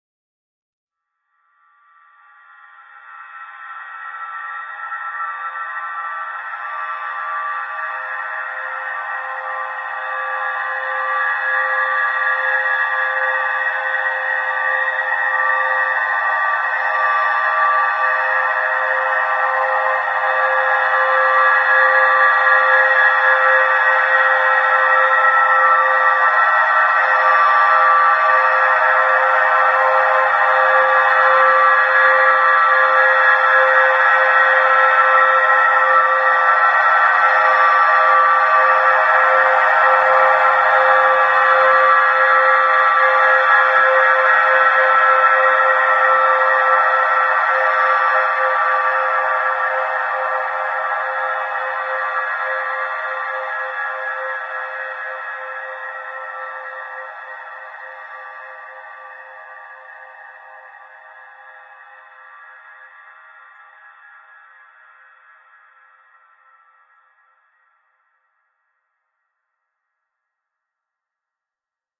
Ambient, Drone, Level
Level sound, ambient.
fear, stalker, ambient, dying